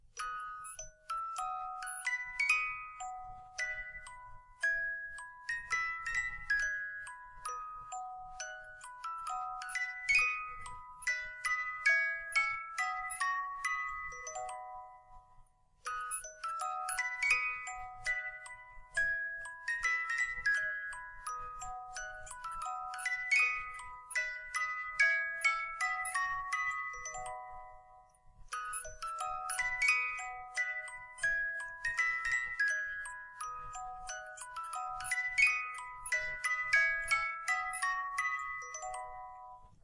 Hurdy Gurdy - Battle Hymn of the Republic
An old fashioned hand-cranked hurdy gurdy playing "Battle Hymn of the Republic."
musical, antique, musicbox, old, sound-museum, hurdygurdy, music-box, historical, melody, mechanical-instrument, historic, instrumental, loopable, hymn, mechanical, musical-box, wind-up, mechanism, hand-operated